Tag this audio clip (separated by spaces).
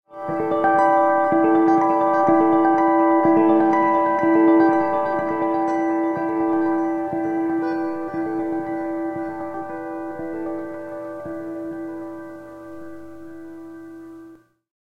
guitar electric harmonics